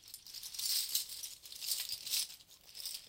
light jingling of metal bottle caps